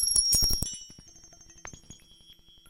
Glass Trickler Loop
Glassy sounding glitch loop.